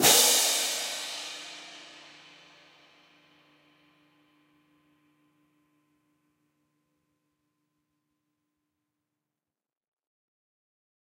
Marching Hand Cymbal Pair Volume 24
This sample is part of a multi-velocity pack recording of a pair of marching hand cymbals clashed together.